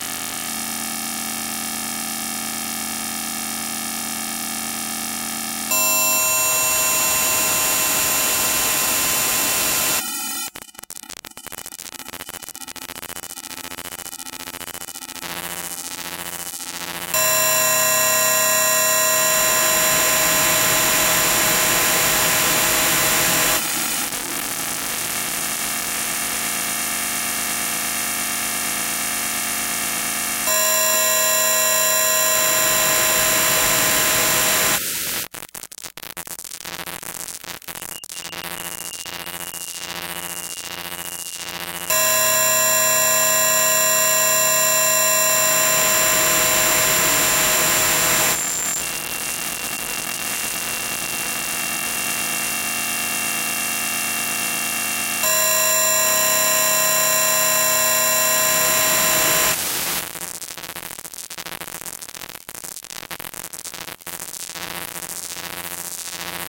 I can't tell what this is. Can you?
pulsar synthesis 06
Sample generated with pulsar synthesis. A harsh high-pitched tonal drone which rythmically dissolves into a thinner modulated drone.
drone, noise, pulsar-synthesis